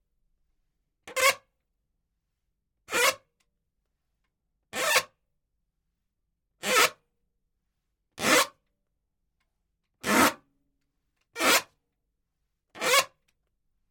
Balloon Creak Short Rub Multiple 2
Recorded as part of a collection of sounds created by manipulating a balloon.